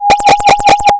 Create a new audi0 track.
Generate > Sine, 816Hz, amplitude : 1, 1m
From 0.00 to 0.10 of the track, apply Fade in Effect
From 0.90 to 1.00 of the track, apply Fade out effect
From 0.10, 0.20, 0.30, 0.40, 0.50, 0.60, 0.70, 0.80 and 0.90 apply silence
From 0.10 to 0.20, 0.30 to 0.40, 0.50 to 0.60, 0.70 to 0.80 and 0.80 to 0.90 apply a Phaser effect with parameters:
Stages : 4, LFO (Hz): 3, LFO (Deg):100,Depth:250,Feedback:99
From 0.10 to 0.20, 0.30 to 0.40, 0.50 to 0.60 and 0.70 to 0.80 apply a reverse effect
Normalize.